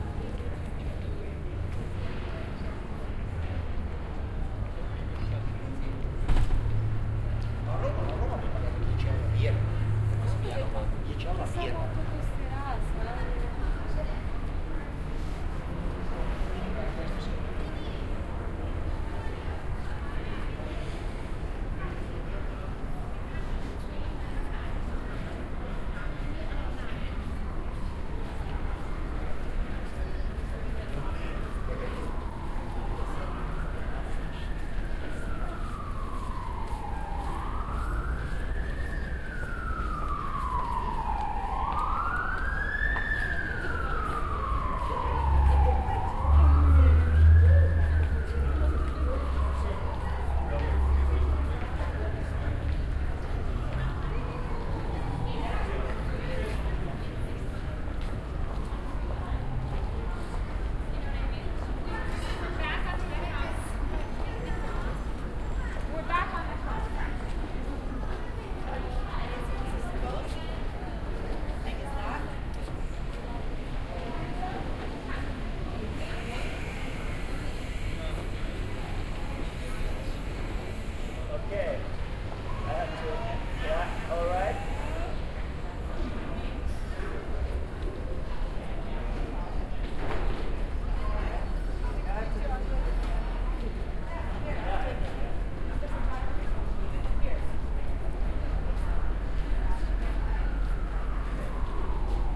LA Hollywood 3 03.12.2006 snip2
Recorded in Los Angeles on Hollywood Boulevard Dez. 2006